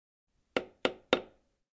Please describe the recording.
Short recording of knocking a plastic cup against a wooden door.